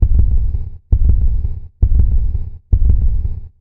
Heart beat (four count) with internal acoustics (as through a stethoscope)